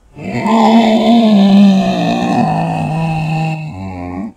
Zombie scream
For when a zombie is in pain or dies or even when it is giving you a warning
Multiuse, You, Why, Tags, Still